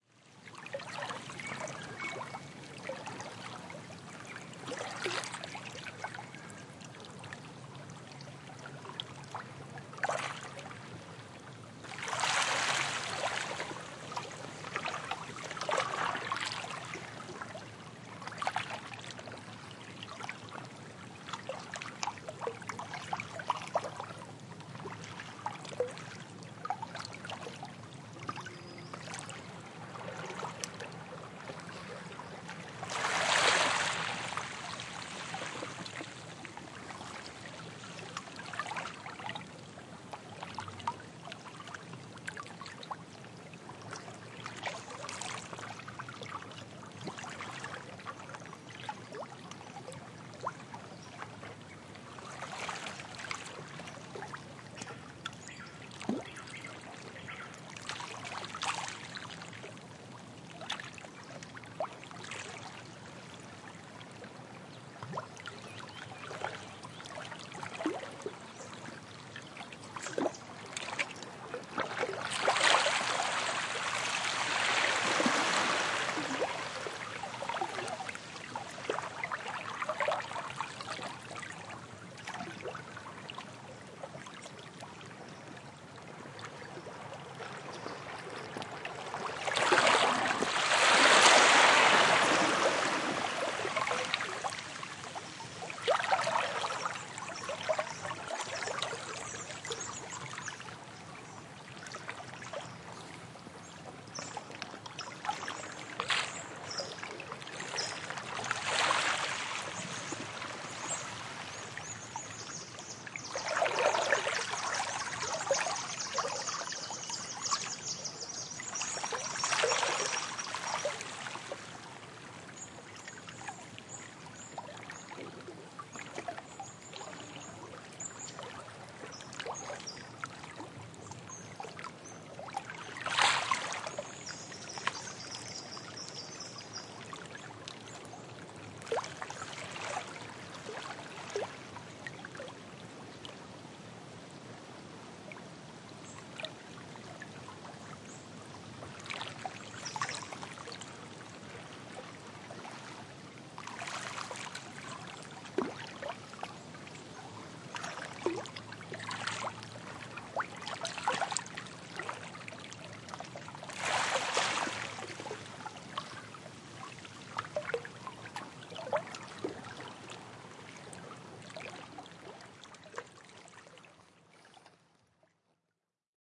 A close miced rocky shore. Birds and water noises. Works best if listened to at low volume as this the water sounds are quite magnified. Tasmania Australia.